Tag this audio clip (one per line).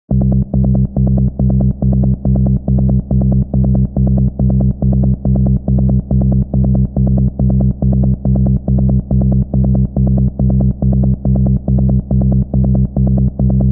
beats
per
minute
140
bpm
darkpsy
trance
bass
basseline
psytrance